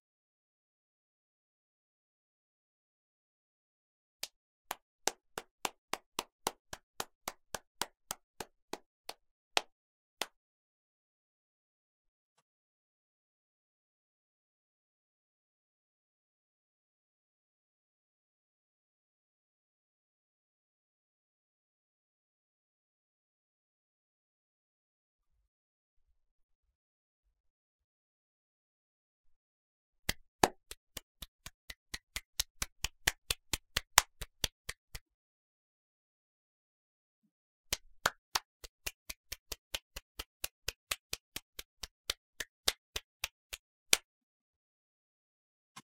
Clapping done by a single person, with the intention of merging all the pieces together and having a full applause.
Recording by Víctor González